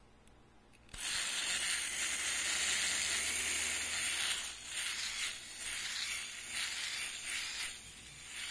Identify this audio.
me running my electric shaver. also sounds like a remote control car.